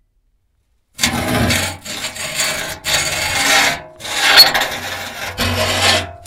metal screech and scraping
Foley SFX produced by my me and the other members of my foley class for the jungle car chase segment of the fourth Indiana Jones film.
scraping, screech, metal